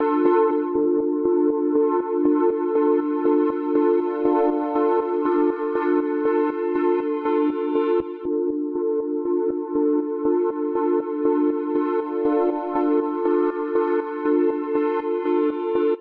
an ambient pad, Thor of course.
ambient, pad, synth